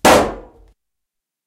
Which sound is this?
Balloon-Burst-05
Balloon popping. Recorded with Zoom H4
balloon, burst, pop